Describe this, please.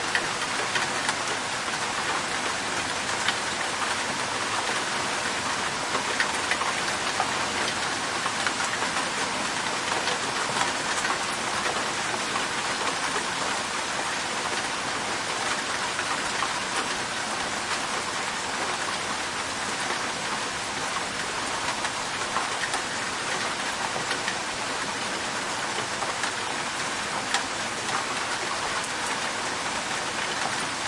It´s raining
atmo, atmos, atmospheric, background-sound, rain, raining, regen